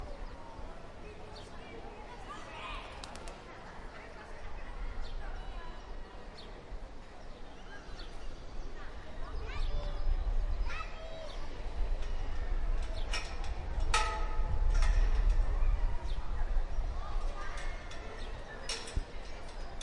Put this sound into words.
Calidoscopi19 Atrapasons TrinitatVella Plaça Trinitat
Urban Ambience Recorded at Plaça Trinitat in April 2019 using a Zoom H-1 for Calidoscopi 2019.
Atrapasons, SoundMap, Nature, Simple, Calidoscopi19, TrinitatVella, Annoying, Humans